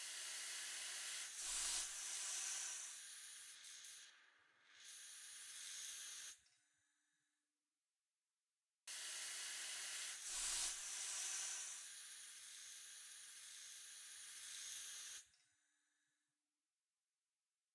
Opening a CO2 tank valve. Slow Air releasing